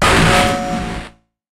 How were these noises made?
FL Studio 21
Track BPM: 160
Instruments: FPC
Drumset / Preset: Jayce Lewis Direct
Effects Channel:
• Effect 1: Gorgon
◦ Preset: Alumnium Octopus (Unchanged)
◦ Mix Level: 100%
• Effect 2: Kombinat_Dva
◦ Preset: Rage on the Kick (Unchanged)
◦ Mix Level: 43%
• Effect 3: Kombinat_Dva
◦ Preset: Loop Warmer (Unchanged)
◦ Mix Level: 85%
Master Channel:
• Effect 1: Maximus
◦ Preset: NY Compression (Unchanged)
◦ Mix Level: 100%
• Effect 2: Fruity Limiter
◦ Preset: Default (Unchanged)
◦ Mix Level: 100%
What is this?
A single 8th note hit of various drums and cymbals. I added a slew of effects to give a particular ringing tone that accompanies that blown-out speaker sound aesthetic that each sound has.
Additionally, I have recorded the notes at various velocities as well. These are indicated on the track name.
As always, I hope you enjoy this and I’d love to see anything that you may make with it.
Thank you,
Hew